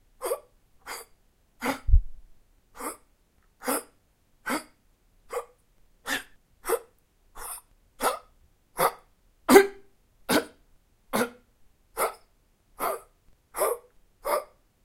Forced human exhales. They were intended to be used in a game when human characters are hit by bullets in the chest forcing air out of the lungs by sheer force or by muscle contractions.
breath, exhale, forced, Human